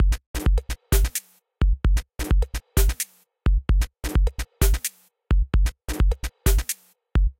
Drum loopHop4 130bpm
drum, electronic, loop